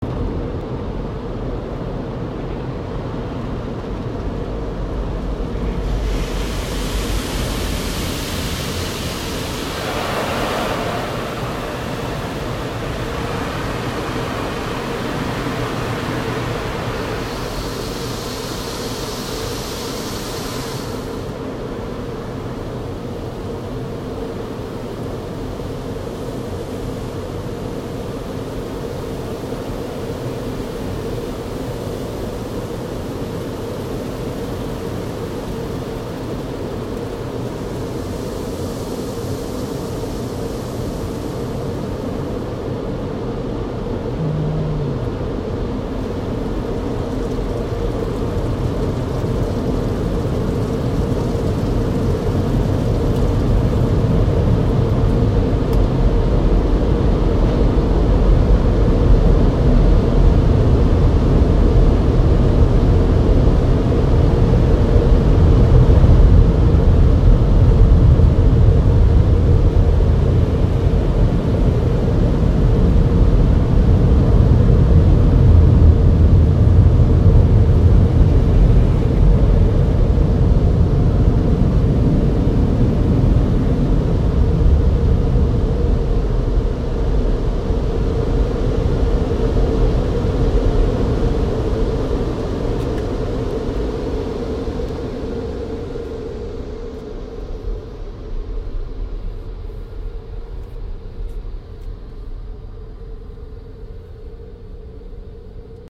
Inside the car during a car wash at Costco.
vehicle, water, car, car-wash